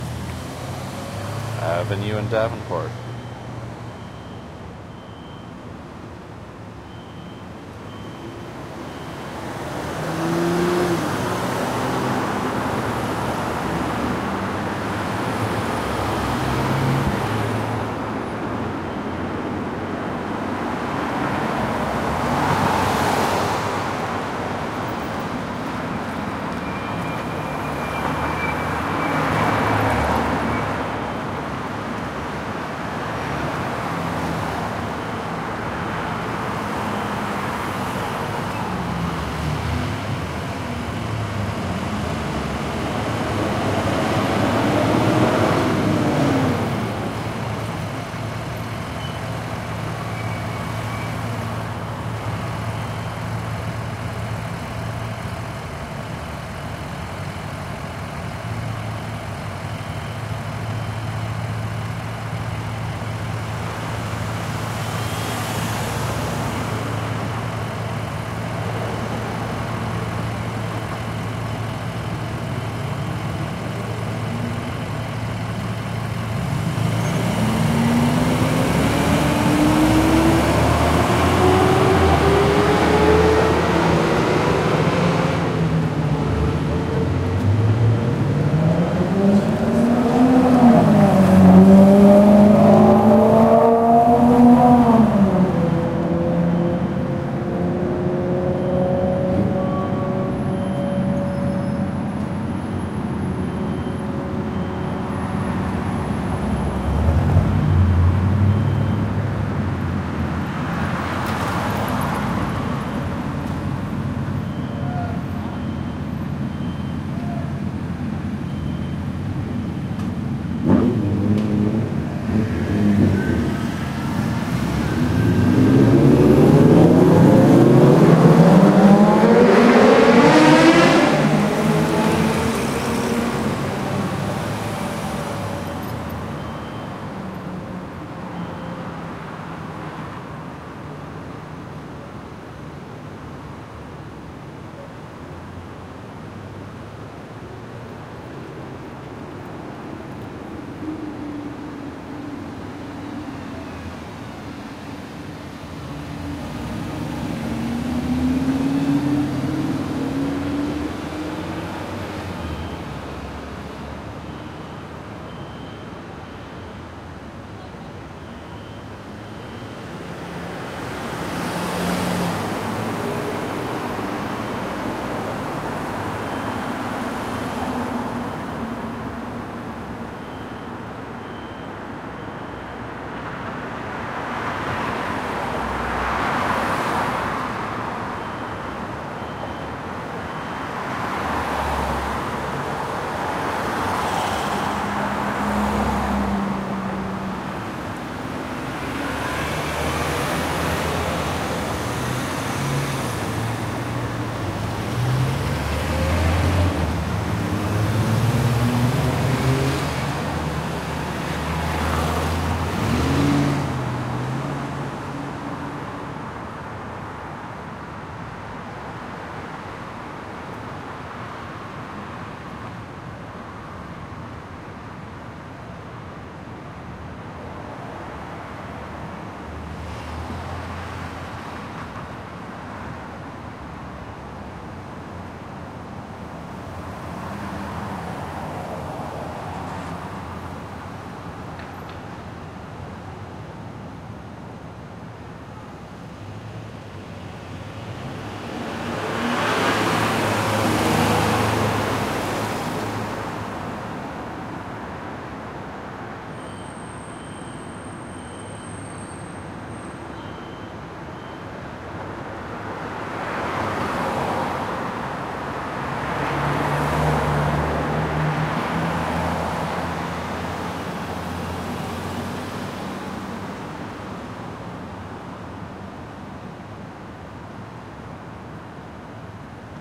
Traffic Avenue and Davenport
recorded on a Sony PCM D50
xy pattern
Avenue, Davenport